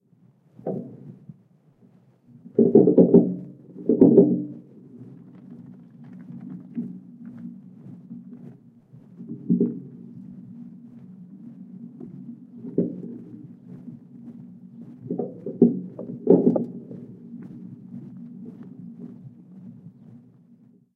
Wheelbarrow Trundling
A stereo field-recording of a partially filled wheelbarrow being wheeled across rough ground, grass with outcropping bedrock.Zoom H2 front on-board mics.
field-recording, xy, stereo, wheel-barrow, wheelbarrow